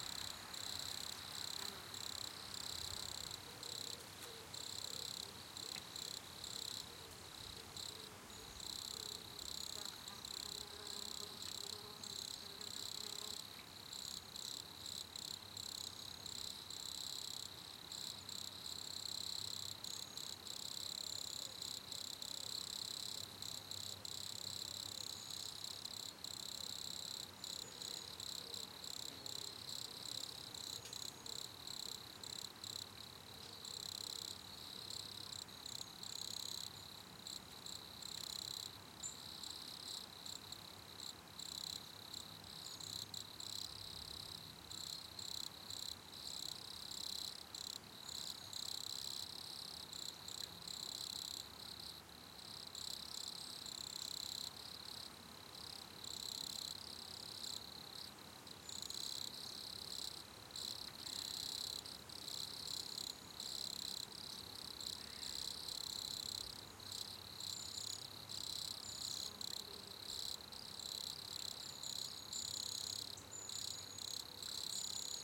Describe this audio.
Microphone: Rode NT4 (Stereo)